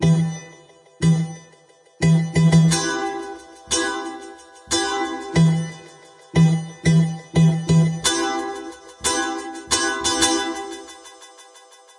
Hip Hop6 90 BPM
stabs, rap, background, trailer, loop, dancing, sample, intro, move, podcast, drop, music, broadcast, part, club, disco, stereo, hip-hop, mix, interlude, instrumental, jingle, chord, radio, pattern, sound, dance, beat, pbm